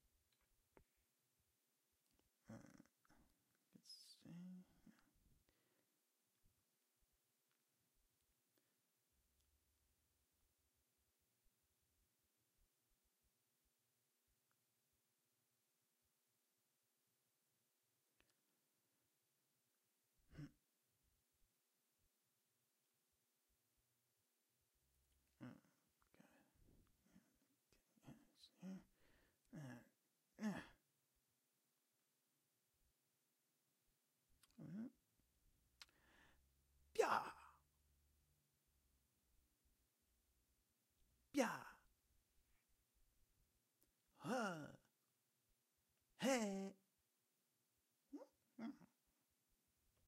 Comical Grunts And Mumbles 3
Comical male grunts and mumbling.
mumble, funny, grunt